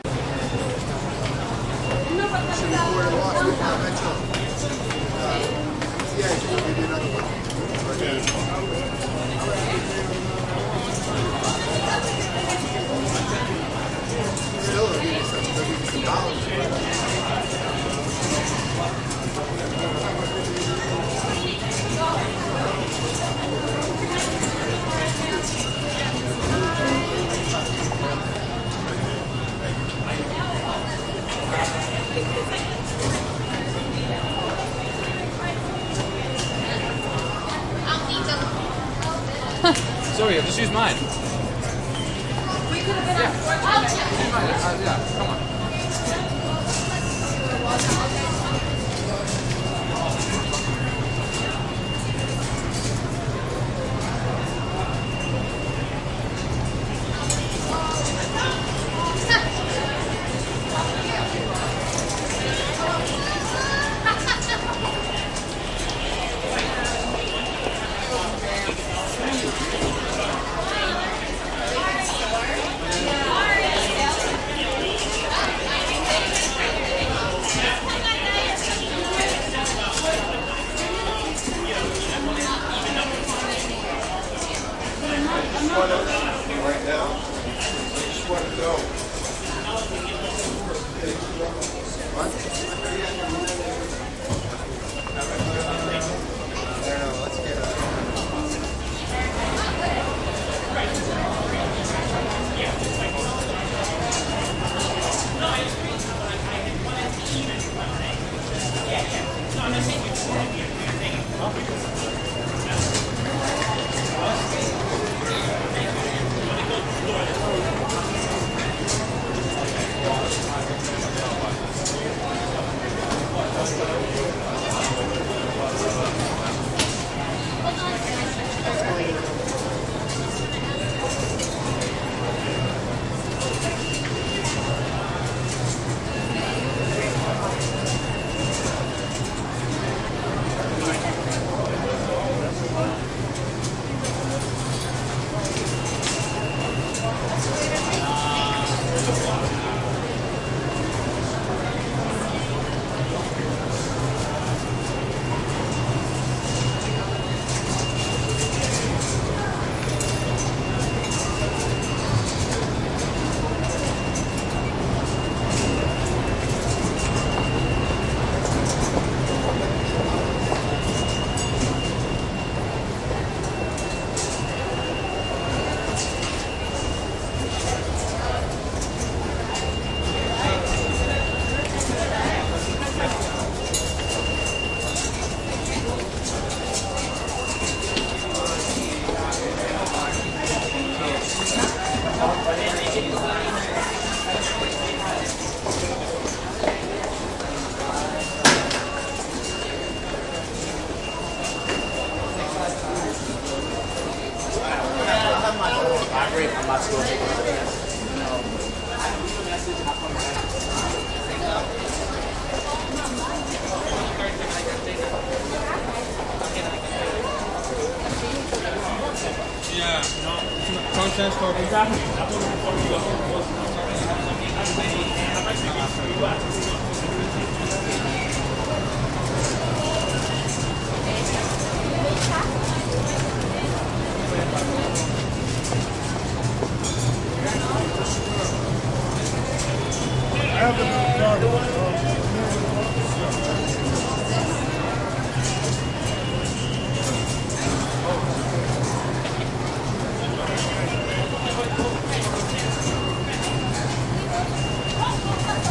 subway turnstyles busy NYC, USA
turnstyles, USA, busy